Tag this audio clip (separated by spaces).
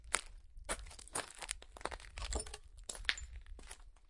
broken glass pick up walking wood